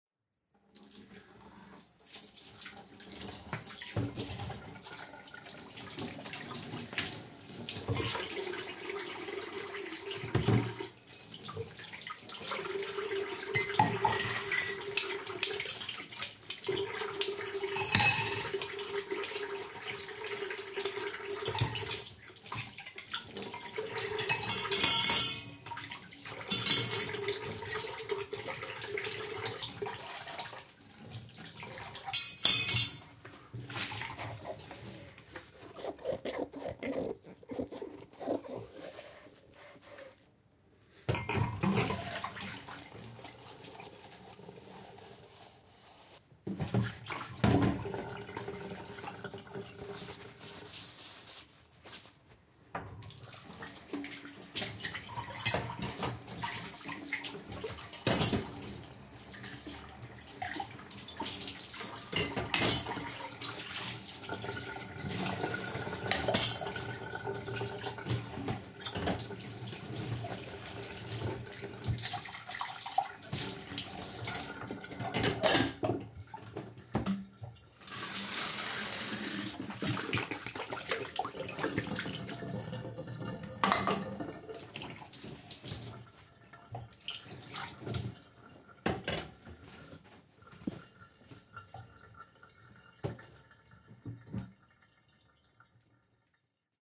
Washing Up
The sounds of someone washing dishes, clanking and clinking cutlery and dishes.